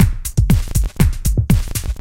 120 bpm loop